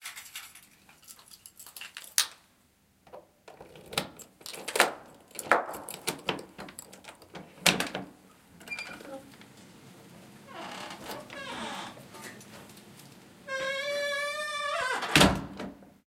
Key unlocks a squeaky door, the door opens and gets closed 2
A key is placed into a metal keyhole of a wooden door. The key is turned once and the squeaky door unlocks. You hear a slight echo as the door lock opens. afterwards the door is closed.